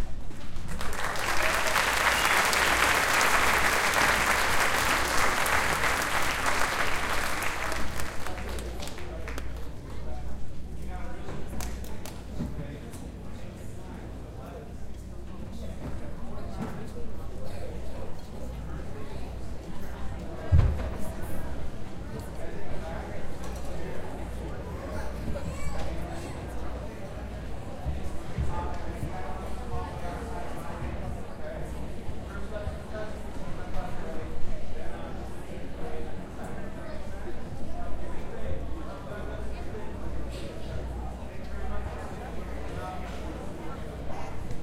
Applause Five
Recorded summer 2013 July. Summer band concert applause captured with a Tascam DR-07 MK II. Check out the file pack for more variations. This sound has not been processed. Thanks for checking this sound out, I hope you can use it!
-Boot